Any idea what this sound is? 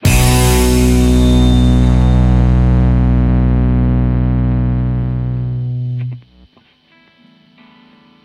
Guitar power chord + bass + kick + cymbal hit